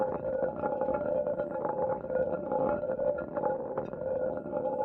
stone mechanisem loop 01
Marble rolling on a stone plate.
Ball, Grinding, Loop, Rolling, Stone